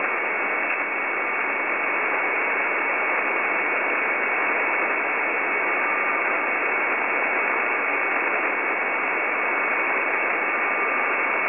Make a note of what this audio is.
Just a portion of static (no radio signals).
Recorded from the Twente university online radio tunner